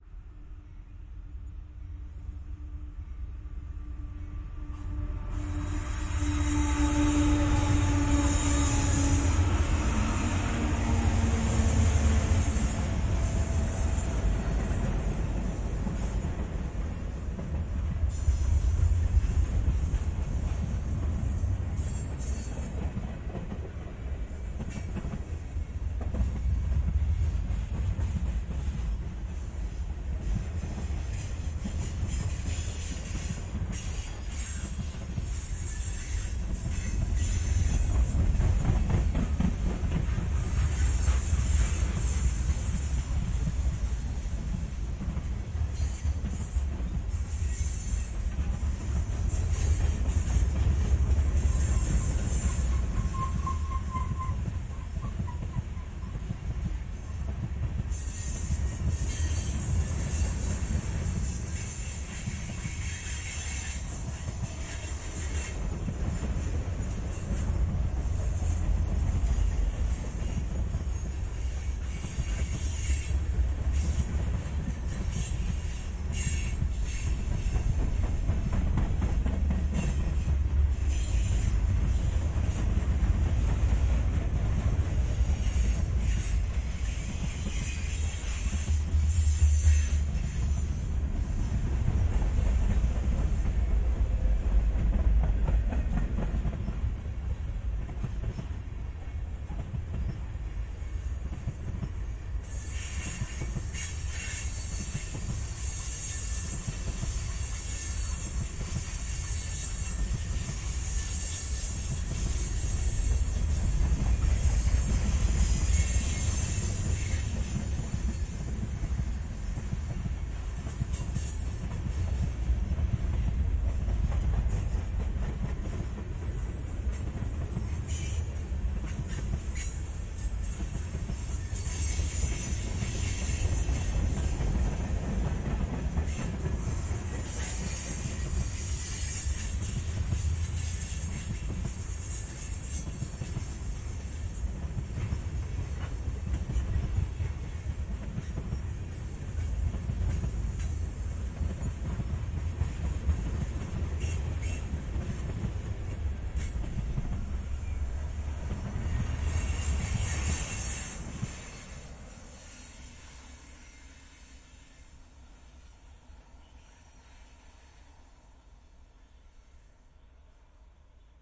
Freight Train Slow3 - Mixdown
real trains passing by. Zoom H6n onboard XY stereo mics, MKE600, AT2020 combined in stereo mixdown. Used FFT EQ to really bring out rumble.
freight, industrial, locomotive, railroad, stereo, train